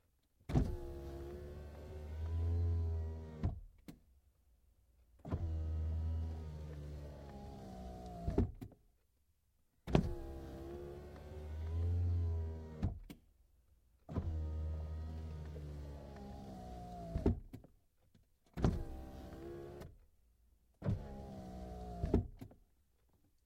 Clip featuring a Mercedes-Benz 190E-16V driver's side window being opened and closed. Recorded with a Rode NTG2 handheld about 1" from the window.